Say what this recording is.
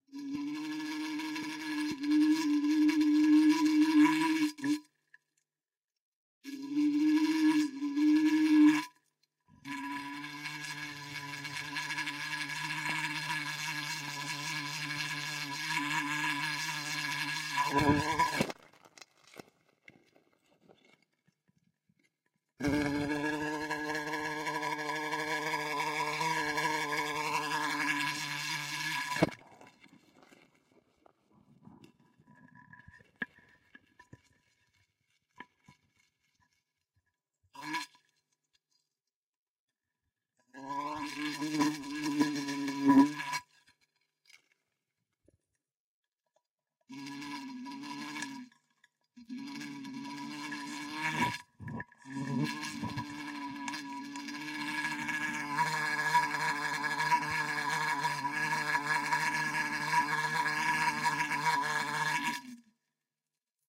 Some bumblebees catch in a glasbox and record the bumble sound inside with a (Panasonic) Ramsa S3 Microphone